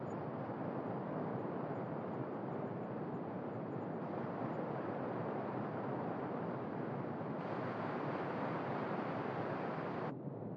desert storm 3
More wind in an open space
joltin'joe's
drone, sound-design, sound-scape